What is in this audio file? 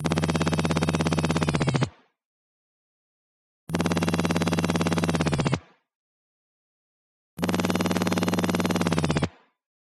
Futuristic motorcycle engine sound 2 (3 pitches)
A sound for some futuristic motorcycle/helicopter engine, for Thrive the game. Made by resynthesizing the first sound in Harmor and experimenting with the knobs. I used other plugins from Image-Line too. All in Fl Studio 10.
It has a little of reverb.
engine, futuristic, motor, vehicle